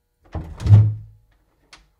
Door Open 6
Wooden Door Open Opening
opening, wooden, door, open